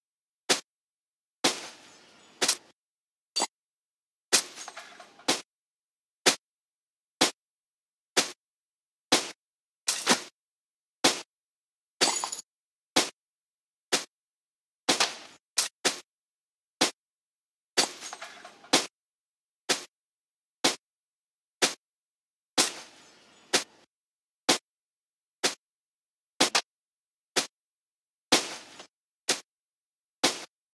Loop at 125 beats per minute of electronic samples that can be used as snare drum. Each sound in the pattern is slightly different from the others.